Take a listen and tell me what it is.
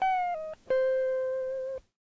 Tape El Guitar 36
tape, guitar, Jordan-Mills, lo-fi, collab-2, vintage, el